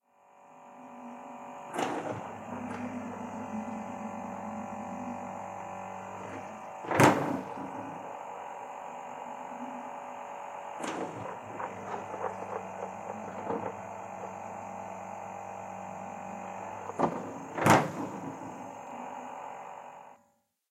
old fridge
old and noisy fridge, recorded with ECM MS907 mic and MD-NZ710 MD
fridge, household